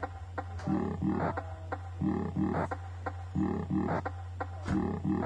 Yamaha Voice Double
Off of my DD 20 I encountered this Random "Alieatron" effect it was kind of scary...
circuit, techno